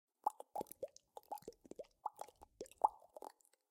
Some Bubbling sounds. Unprocessed